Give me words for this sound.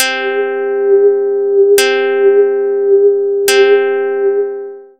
RICHARD Arnaud 2014 2015 PenduleRetroFuture
HOW I DID IT?
Using 2 tracks
1st track : sinusoid 400hz, wahwah effect.
2sd track : 3 plucks, pitch 60, gradual.
DESCRIPTION
// Typologie (Cf. Pierre Schaeffer) :
X' (impulsion varié) + V (continu varié)
// Morphologie (Cf. Pierre Schaeffer) :
1- Masse:
- Son canelé
2- Timbre harmonique:
sec et terne
3- Grain:
Son lisse
4- Allure:
Absence de vibrato
5- Dynamique :
Attaque douce et graduelle
6- Profil mélodique:
Variations serpentine glissantes et sans cassure
7- Profil de masse
Site :
Variation d'un même son ponctuée par un deuxième son abrupte et net
Caibre:
Pas de filtrage, ni d'égalisation
future, retro